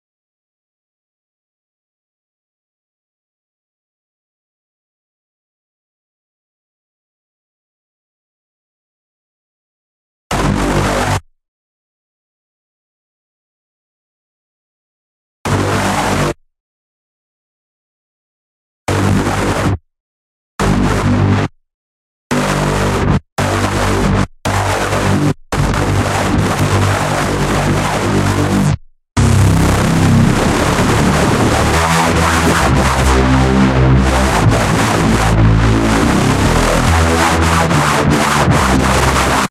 Dubstep Growl Sample
A vicious growl sample I made in FL Studio 12.
You can use this sound however you like.